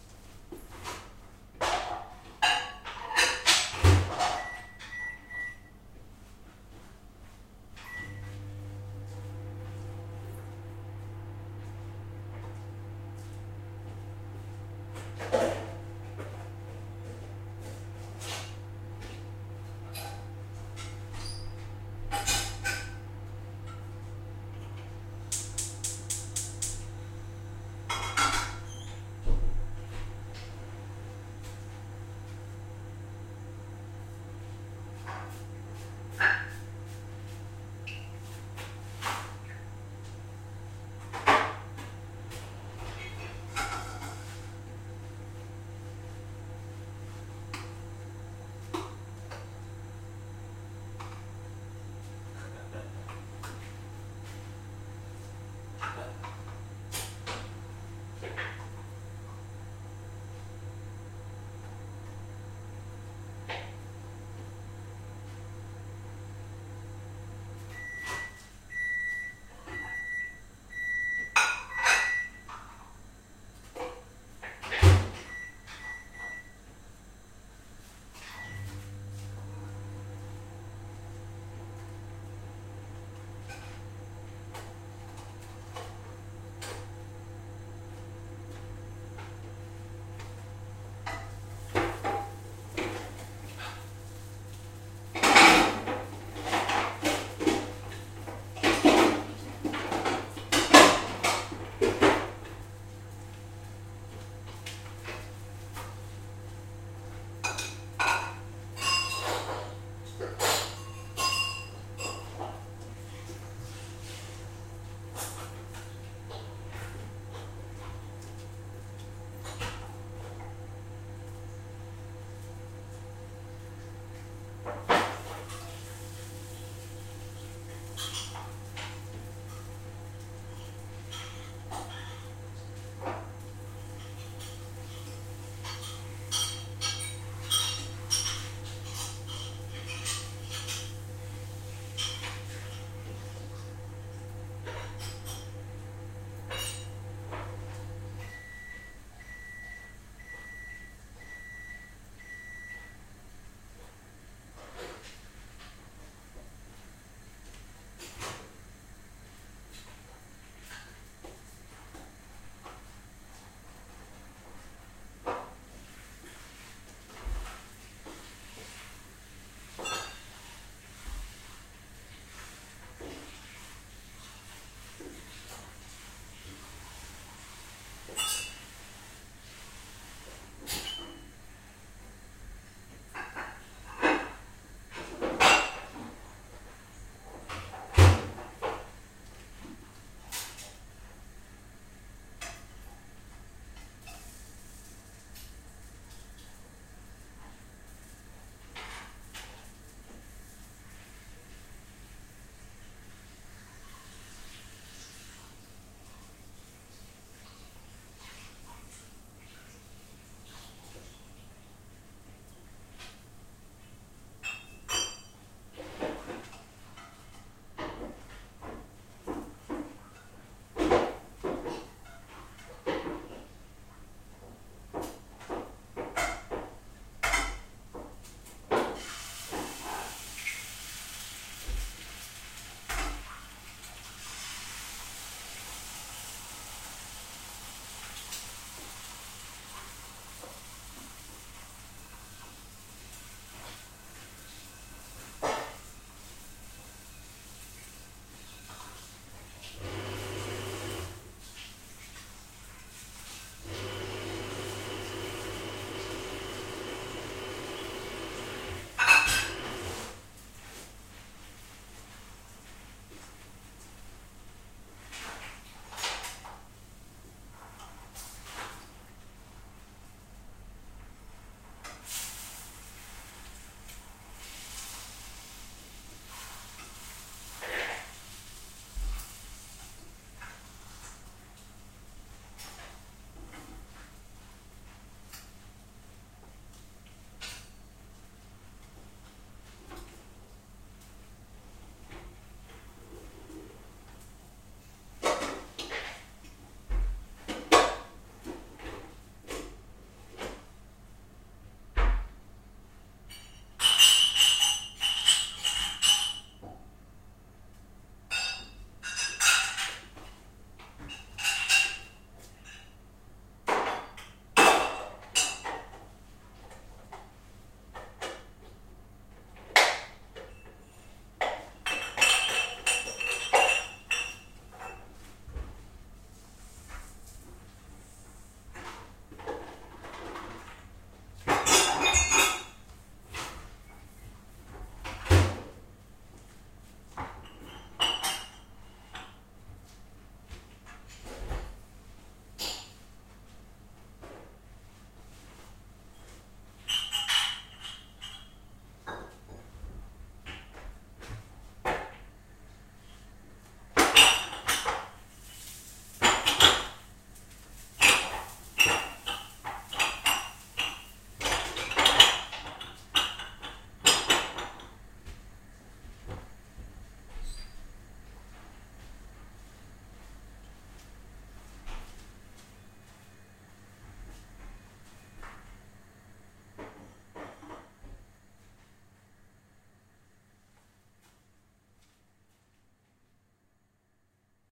Kitchen noises microwave
6 minutes 20 secs of Lunch time Kitchen noises with cooking eggs, microwave open close and use, gas hiss, mugs clings, light washing up noises open drawers reset pans, cups and mugs
clings, cooking, eggs, gas, hiss, Kitchen, microwave, mugs, up, washing